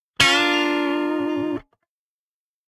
Funky Electric Guitar Sample 13 - 90 BPM
Recorded using a Gibson Les Paul with P90 pickups into Ableton with minor processing.
sample, rock, funk, electric, guitar